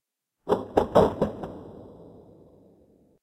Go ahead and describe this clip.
Releasing a Minolta reflex-camera. Recording pitched down to approximately 20 percent of original speed, giving the impression of a big machine whose mechanical parts move in a rhythmic way while producing something in an ample industrial hall. Vivanco EM216, Marantz PMD671.